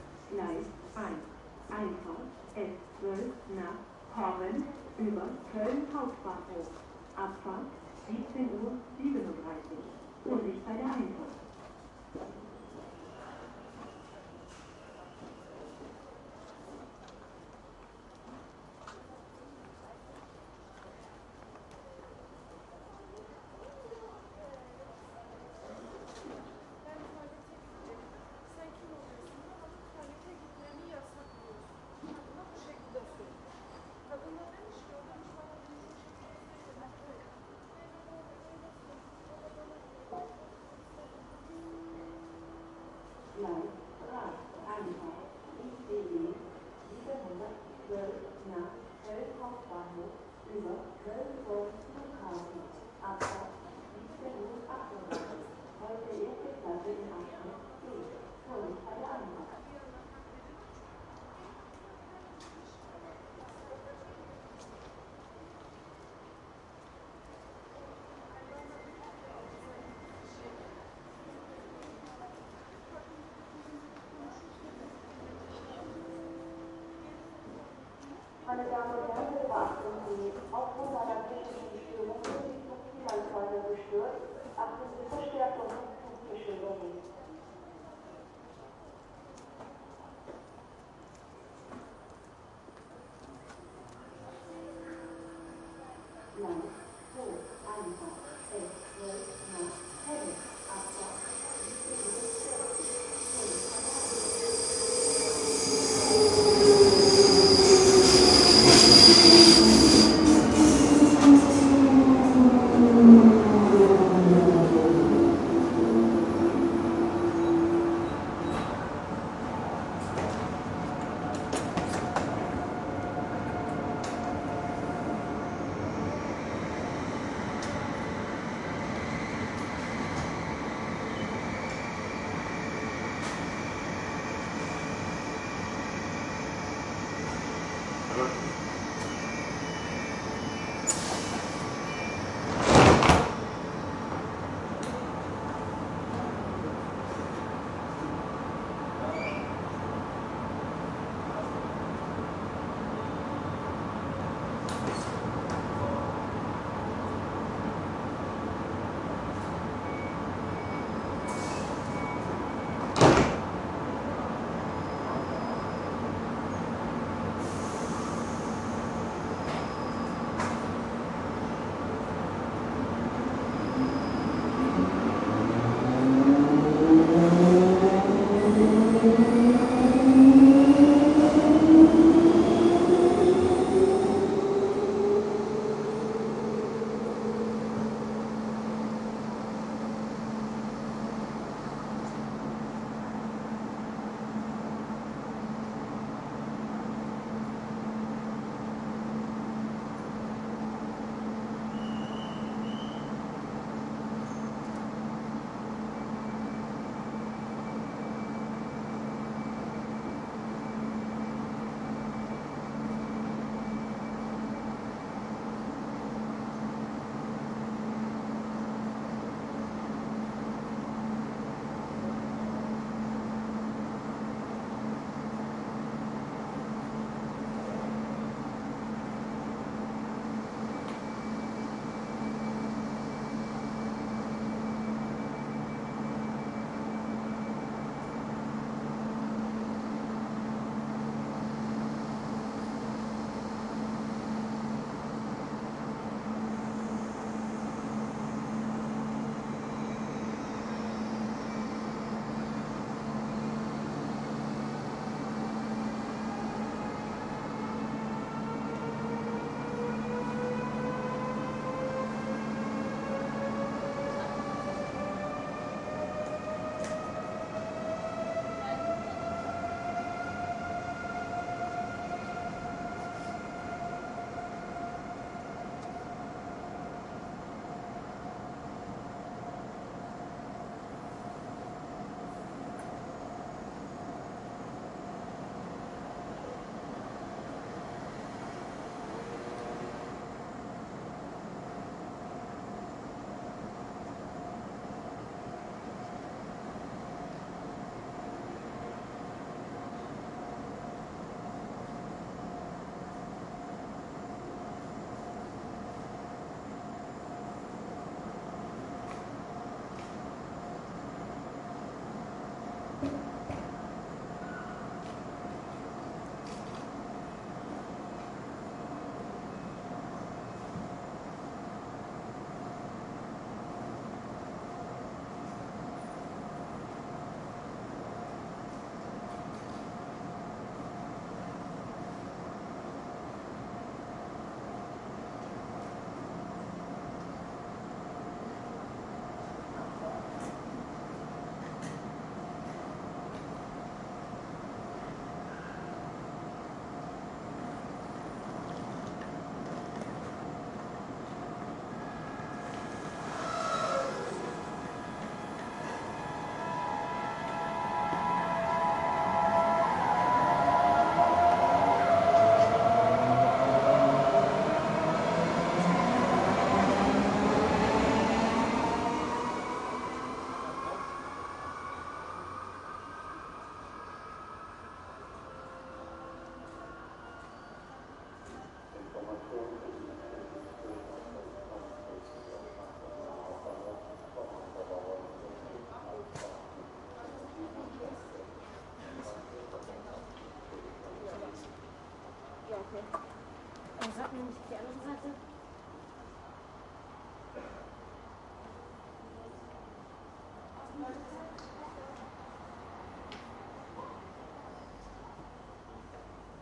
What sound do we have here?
Sieg-Köln+7db
Siegburg-Köln Bahnhof train station stereo recording. I made a stereo track from Siegburg train station at about 17:00hrs with announcement to Köln, also there´s a few German and Turkish people talking ambience, hope it work for you!
Siegburg, K, cologne, ln, Stereo, Railway, free, ambience, Field-Recording